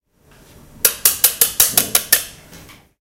mySound SPS Semra
Sounds from objects that are beloved to the participant pupils at the Santa Anna school, Barcelona. The source of the sounds has to be guessed.
CityRings, Ghent, mySoundSemra, Stadspoortschool